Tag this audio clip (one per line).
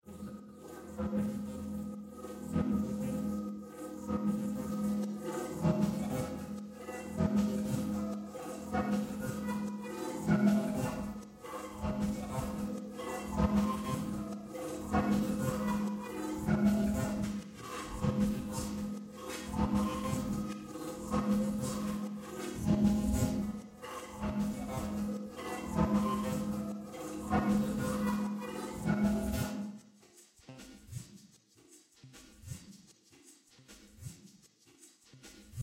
ambient
atmospheres
backgrounds
clip
copy
cuts
distorted
glitch
heavily
pads
paste
processed
rework
saturated
soundscapes
tmosphere
valves